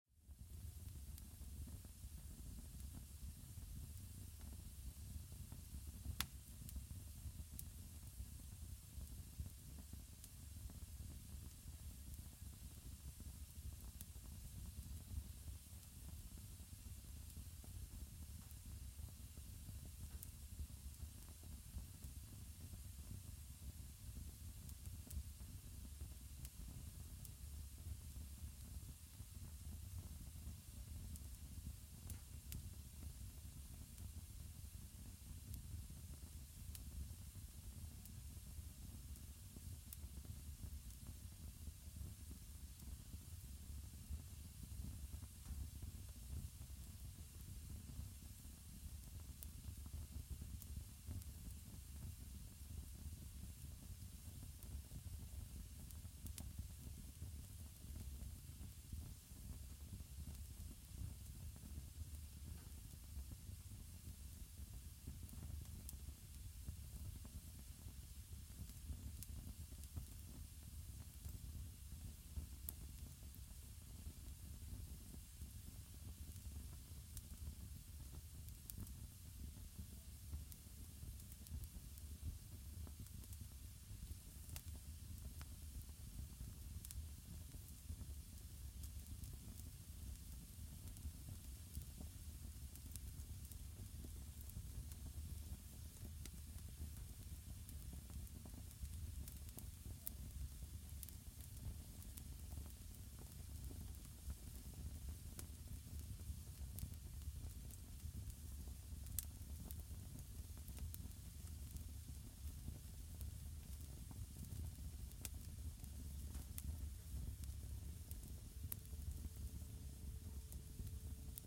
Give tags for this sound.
burning
fire
fireplace
flames

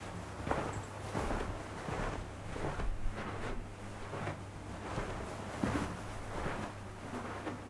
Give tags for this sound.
Foley
carpet
walking

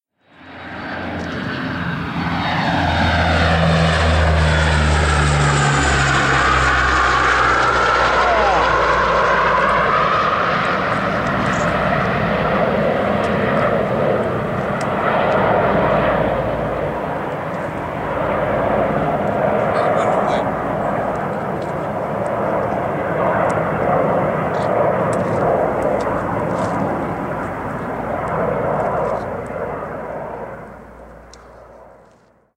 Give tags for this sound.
airliner
doppler
Electra
flyover
L-188
Lockheed
turboprop

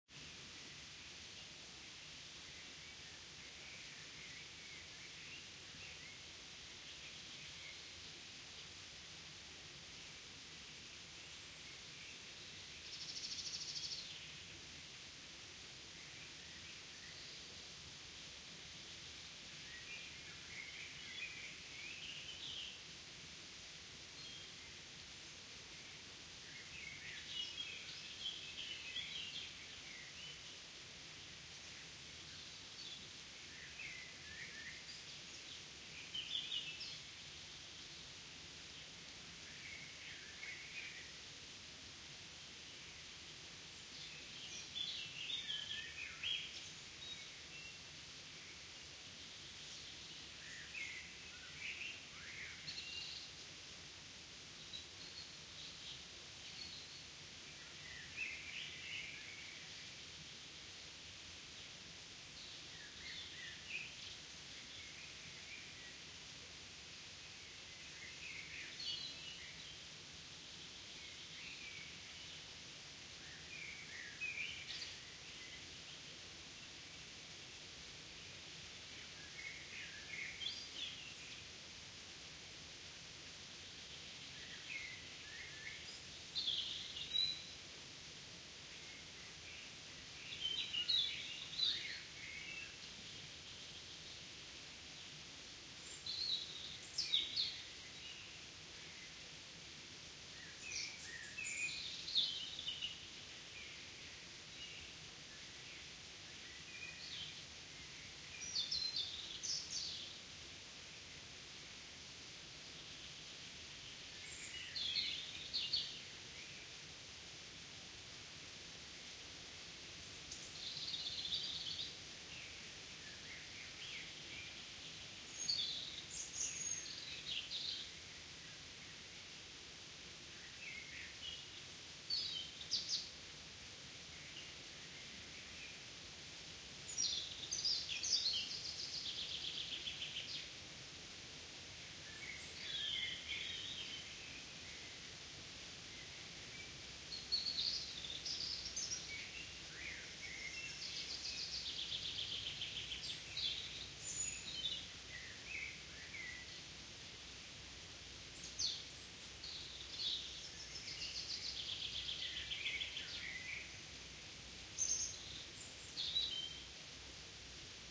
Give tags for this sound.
birds
birdsong
field-recording
forest
nature
stream
water
woods

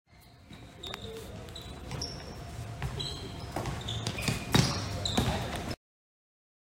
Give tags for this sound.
basketball
bounce
knall
smacker

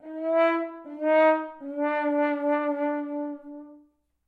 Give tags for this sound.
fail
wah
sad
french-horn
horn
you-lose
wah-wah
sad-trombone
lose
fail-sound
trombone

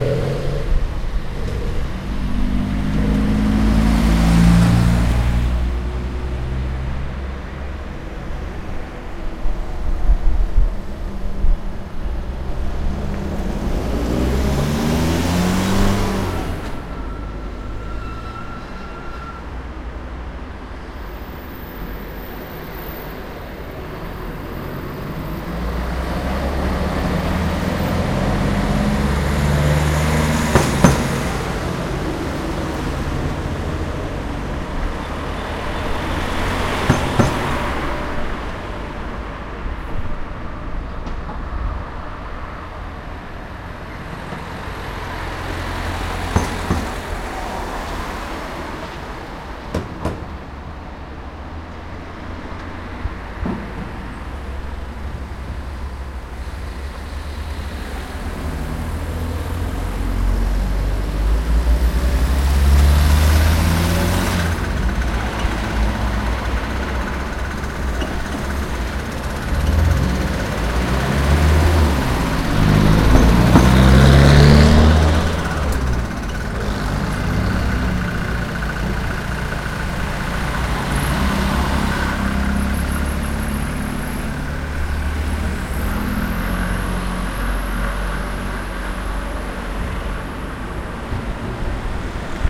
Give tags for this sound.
street
cars
field-recording
traffic

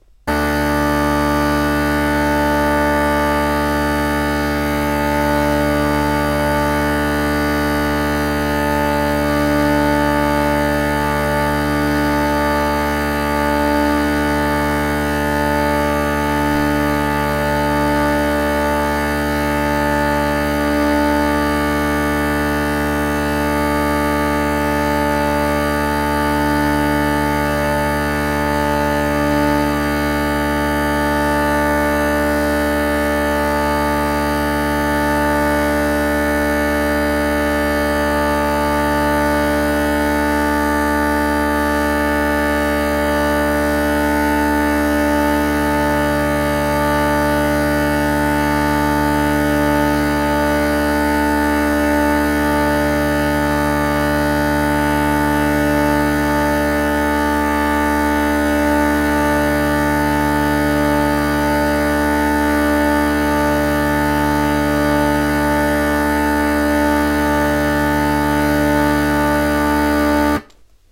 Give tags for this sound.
instrument
irish

